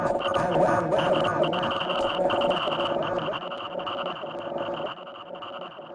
delayed bed of shuffling static with pulses of throaty mid-lo bass purr inter spaced with randomly inserted male vocal fragment saying "and"
loud to soft
equipment used: